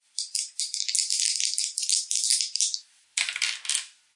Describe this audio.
Rolling 2 die
A sound of 2 die rolling... and the number is?!
dice, game, games, play, rolling